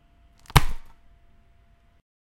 DVD Case Close
A DVD case closing.